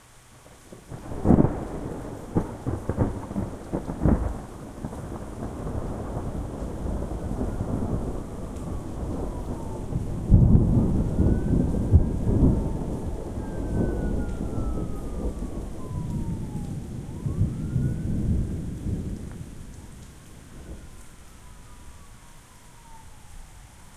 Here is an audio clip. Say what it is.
This is a pack of the very best recordings of thunder I made through April and May of this year. Many very loud and impressive thunder cracks, sometimes peaking the capabilities of my Tascam DR-03. Lots of good bass rumbles as well, and, as I always mention with such recordings, the actual file is much better quality than the preview, and be sure you have good speakers or headphones when you listen to them.
water, pour, pitter-patter, rain, lightning, bass, loud, boom, splash, cats-and-dogs, deep, thunder, rumble, crack